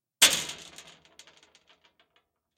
throwing pebbles onto metal10
Contact mic on a large metal storage box. Dropping handfuls of pebbles onto the box.
stones, pebble, pebbles, percussive, metal, impact, stone, percussion, tapping, rubble, clack, contact-mic, gravel, piezo, tap, clacking, rocks, metallic